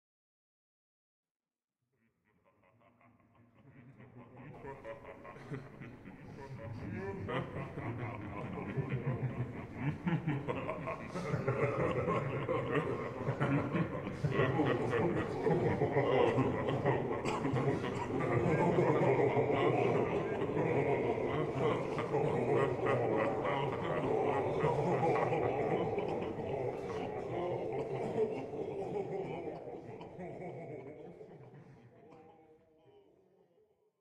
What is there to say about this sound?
laughing low short
a group of men is laughing
group, hohoho, laughing